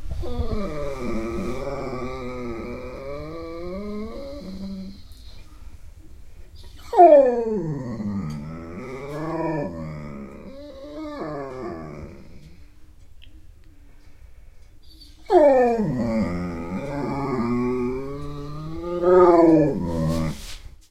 Igor Moan 1
A series of three vocalizations from our Alaskan Malamute, Igor, starting off as an impatient, groaning moan and evolving into a petulant sprechstimme grumble requesting our presence at breakfast. Recorded early morning in our bedroom with a Zoom H2.